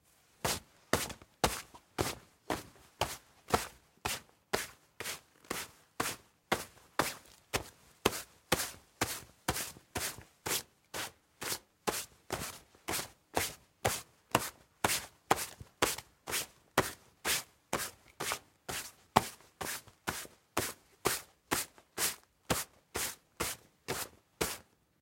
Beating Carpet Rug Cleaning Hard Pack
Beat, field-recording, Fabric, Carpet, tempo, Hard, Beating, Clothes, Desert, Pack, Cotton, Sample, Fast, Indoors, Washing, Dust, Hot, Drum, Drying, Rug, Cleaning, Kitchen, Dry, Garden